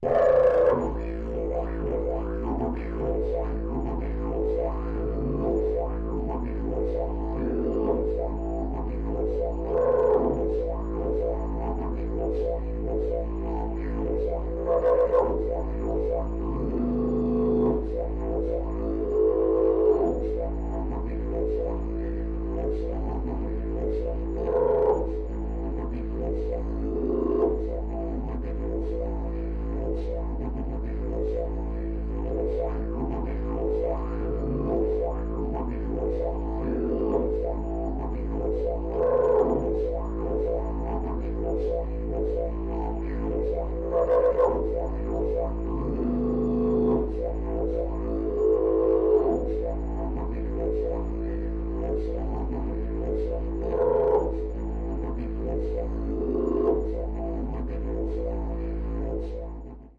This sample pack contains five 1 minute passes of a didgeridoo playing the note A, in some cases looped. The left channel is the close mic, an Audio Technica ATM4050 and the right channel is the ambient mic, a Josephson C617. These channels may be run through an M/S converter for a central image with wide ambience. Preamp in both cases was NPNG and the instrument was recorded directly to Pro Tools through Frontier Design Group converters.